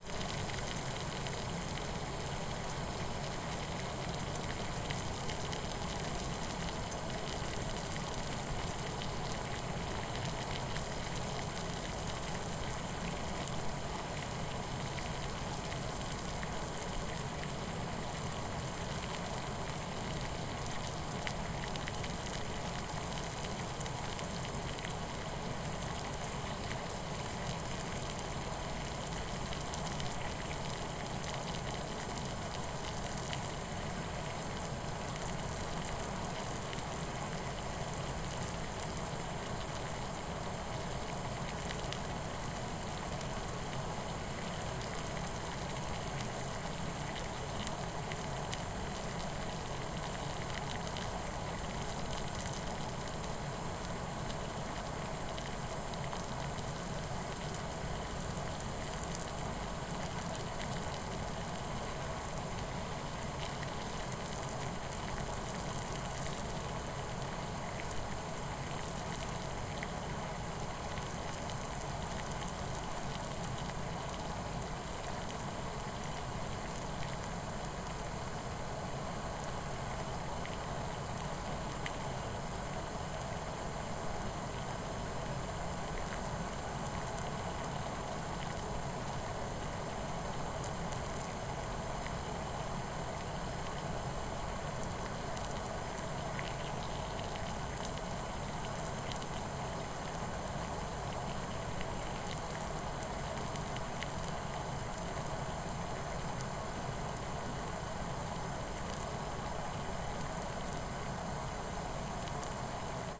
Eau-bouillante2
Boiling water in a saucepan on electric cooktop.